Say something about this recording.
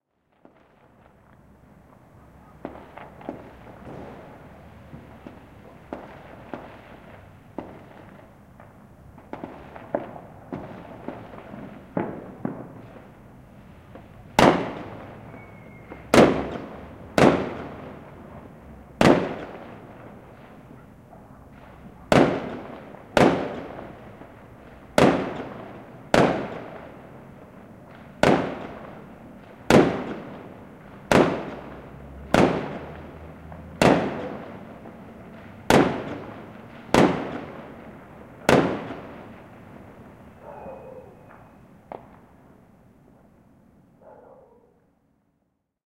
FR.CTC.05.fireworks.1
loud, barking, dog, mzr50, sound, ecm907, field-recording, noise, bark, fireworks, explosion